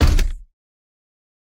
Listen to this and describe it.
MECH STEPS - 1
Footstep for mechanical droid or any type of medium sized robot.
droid, scifi, robot, mech